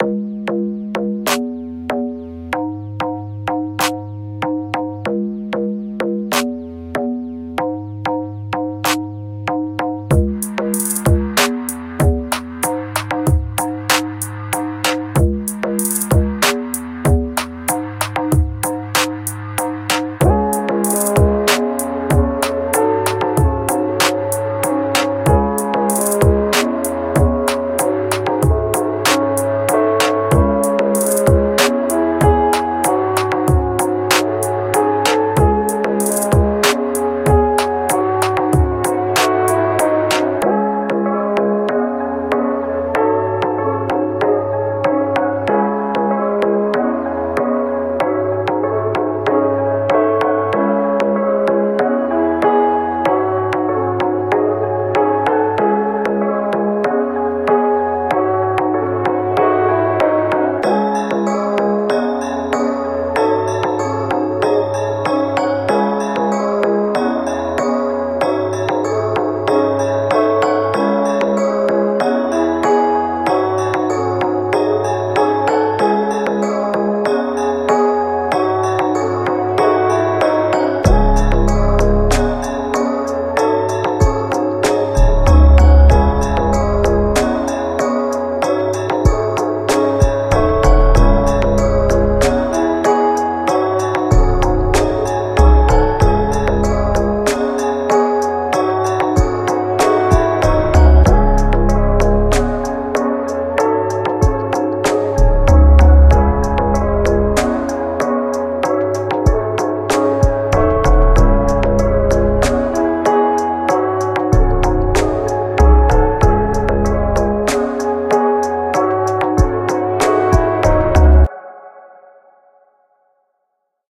The Lair
adventure, dark, lair